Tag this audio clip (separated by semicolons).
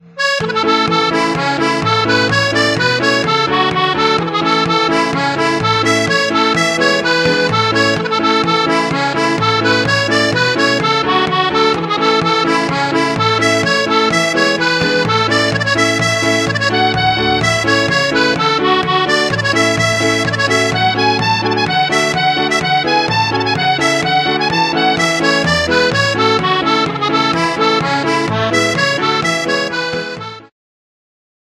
Accordina; Accordion-VST; Concertina; Pianica; Piano-Accordion